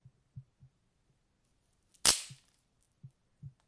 Break a stick
stick, break, wood